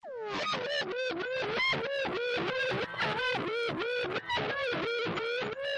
Strange voice
Panned processed voice
distorted, glitch, voice